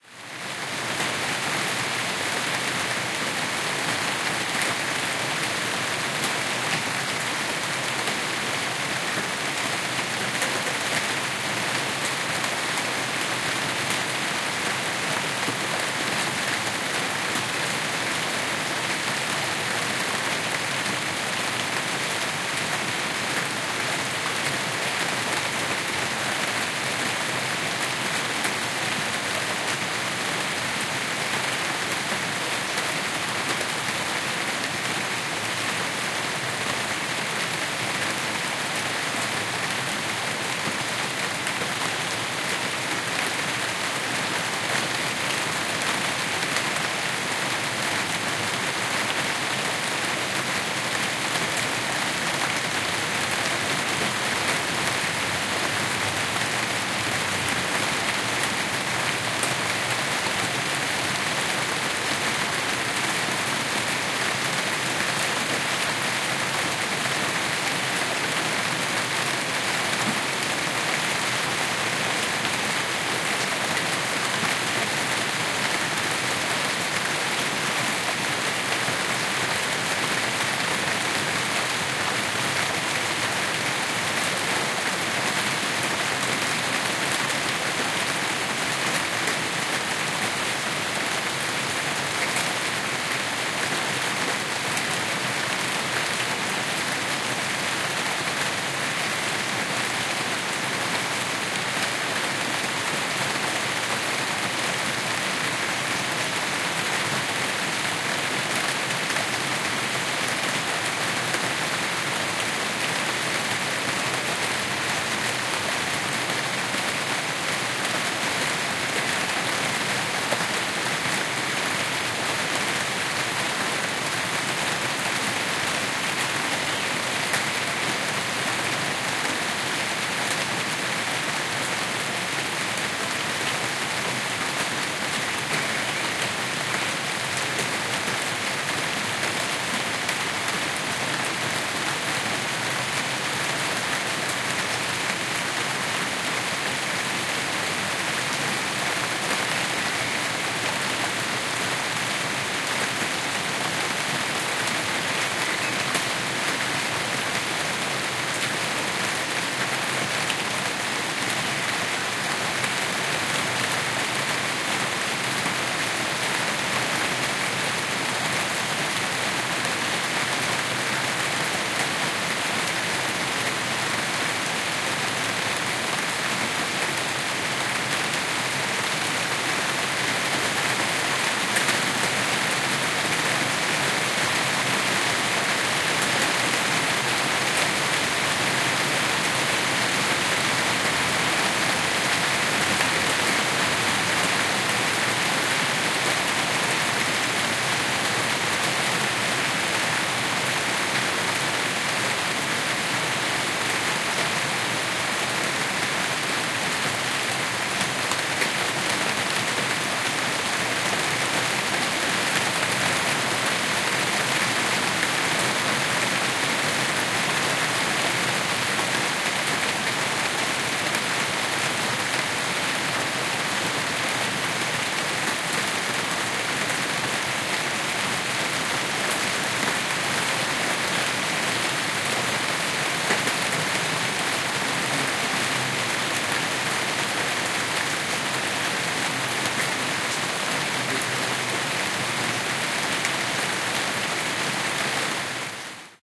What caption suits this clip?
Slight EQ (2 DB highs and Low Cut).